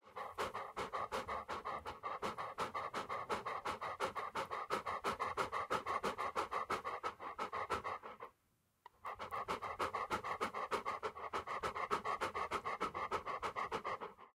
Dog Breathing
breath, breathing, dog